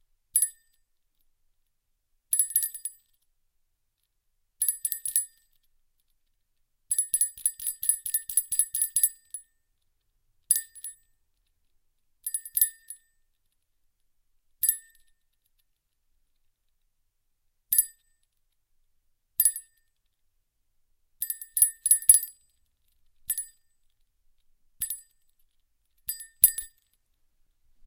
1" ornamental bell